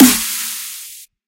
Dubstep Snare
Really awesome dubstep snaare.
Complextro, Dubstep